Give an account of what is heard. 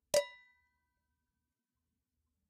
Collective set of recorded hits and a few loops of stuff being hit around; all items from a kitchen.
Kitchen, Knife, Wood, Domestic, FX, Loop, Hit, Fork, Hits, Metal, Metallic, Pan, Percussion, Saucepan, Spoon